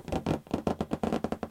romper esplotar arrancar